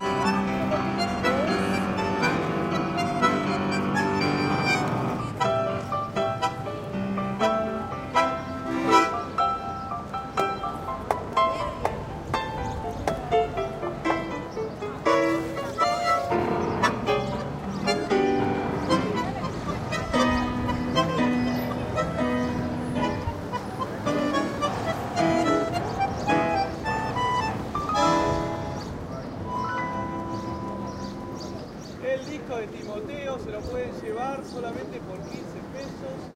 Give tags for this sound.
tango; buenos

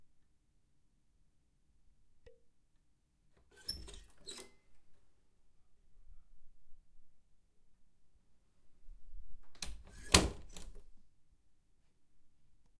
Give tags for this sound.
creak; creaky; close; opening; closing; wooden; open; clunk; squeaky; squeak; handle; door; wood

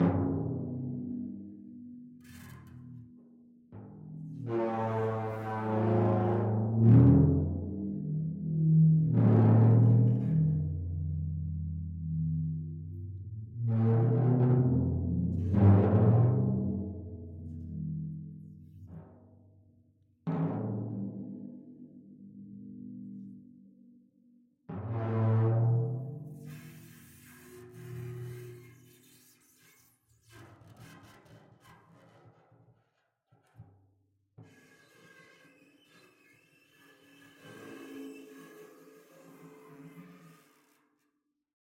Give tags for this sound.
superball; unprocessed

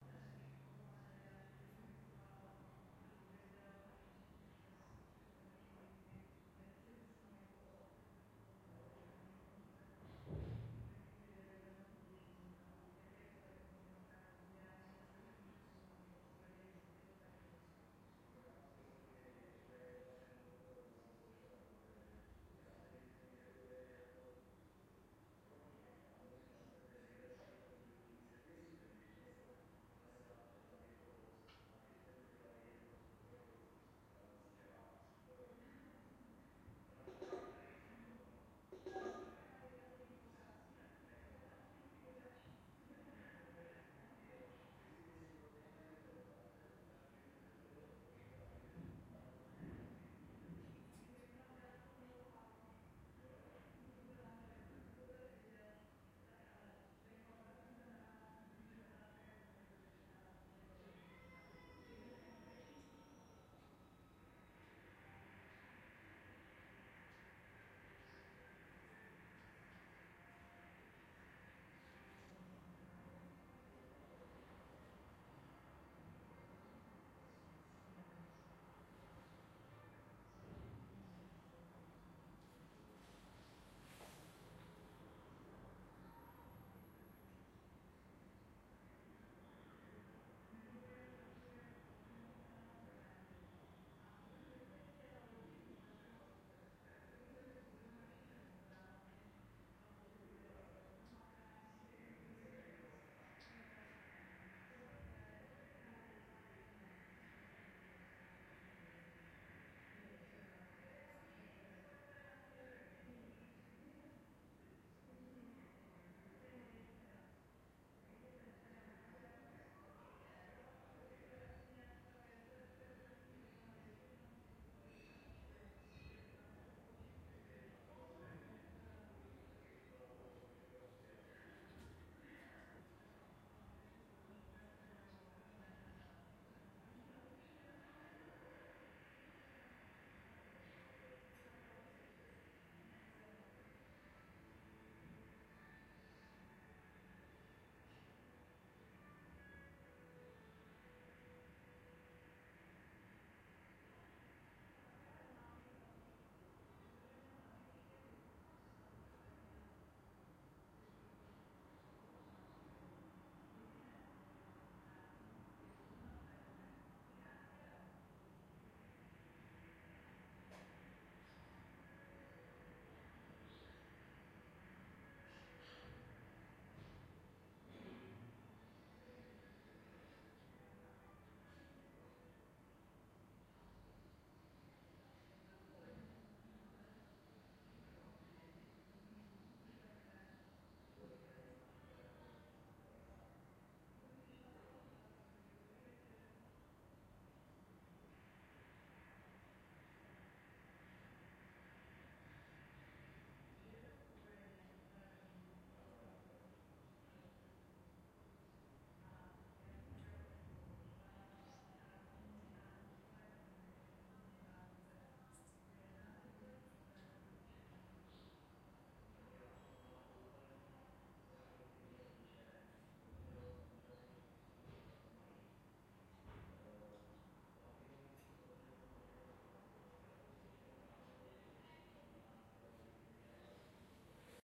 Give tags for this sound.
ambiance; ambience; ambient; apartment; atmo; atmos; atmosphere; atmospheric; background; background-sound; distant; drone; field-recording; general-noise; hall; indoor; interior; noise; people; show; silence; soundscape; television; tv; voice; voices; white-noise

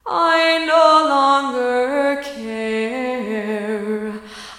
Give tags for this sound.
ambient; female-vocal

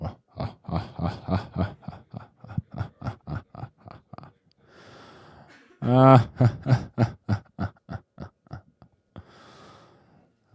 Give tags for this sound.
laughs; male; silent

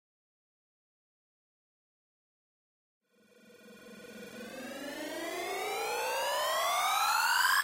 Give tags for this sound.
club; dance; samples

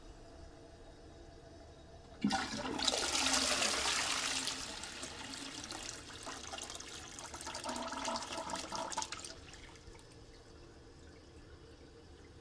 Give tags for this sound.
flush; toilet; trickle; water

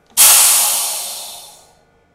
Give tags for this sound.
industrial; oil; slips; air; mechanical; pressure; compressed; release; rig

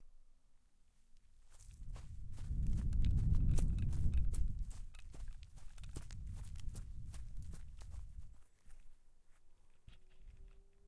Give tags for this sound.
wooden; container; fast; rubbish; quick; pipe; boots; steps

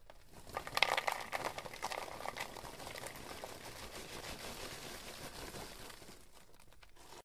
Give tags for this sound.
snack; dm152; bowl; popcorn

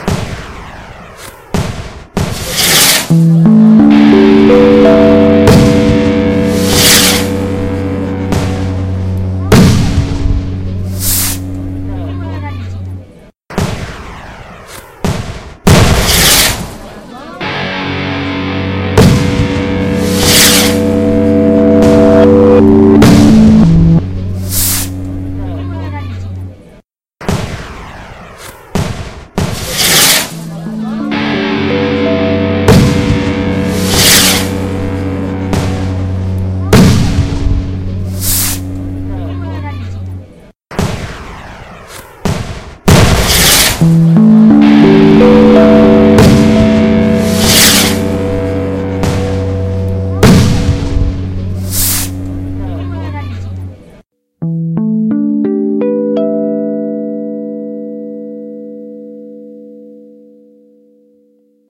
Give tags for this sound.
rocket; rockets

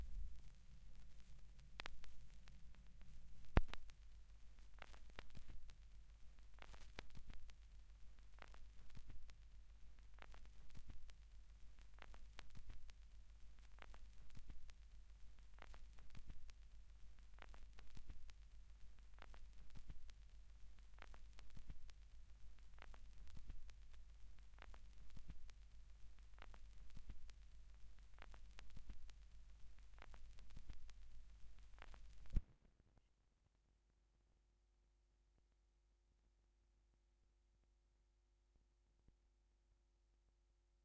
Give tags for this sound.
natural; crackle; vinyl; LP; loop

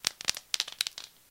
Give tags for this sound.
bubblewrap; dare2

